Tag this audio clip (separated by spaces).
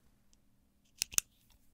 clicks click pen clicking